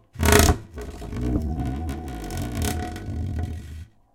Wood Plank Scraping Close-Mic Long
Scraping a plank. Recorded in Stereo (XY) with Rode NT4 in Zoom H4.
wood, scratch, rubbed, grind, file, rubbing, shuffled, wooden, grinding, scrape, block, squeaky, scraping, plank, squeaking, shuffling, scratching, filing